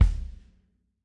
JP Kick 5 room
Great sounding drums recorded in my home studio.
Roomy, Vintage, Kick, Drum